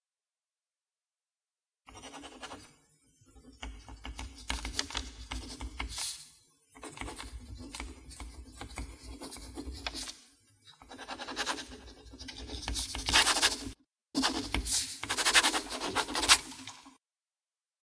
Hyacinthe write print & cursive hard surface edited
write print & cursive hard surface